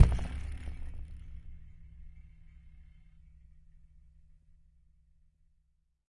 Hit low-14 004
recordings of a home made instrument of David Bithells called Sun Ra, recordings by Ali Momeni. Instrument is made of metal springs extending from a large calabash shell; recordings made with a pair of earthworks mics, and a number K&K; contact microphones, mixed down to stereo. Dynamics are indicated by pp (soft) to ff (loud); name indicates action recorded.
acoustic, bass, knock, low, metalic, percussive, spring, wood